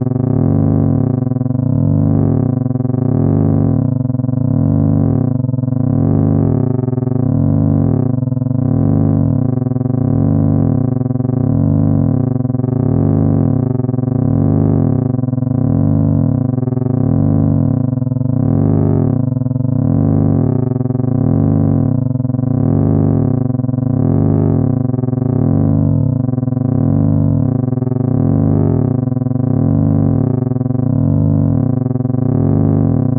Oscillating energy [loop] 01 slow stable
Created using an A-100 analogue modular synthesizer.
Recorded and edited in Cubase 6.5.
It's always nice to hear what projects you use these sounds for.
50s, 60s, ambience, city, classic, conduit, electronic, energy, field, loop, retro, science-fiction, sci-fi, scifi, shield, space, spaceship, synthetic